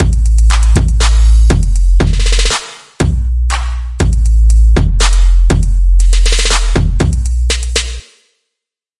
trap beat mk 2
yet another trap beat:)
beat, drumbeat, drums, hard, hiphop, loop, trap